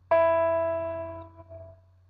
piano normal e4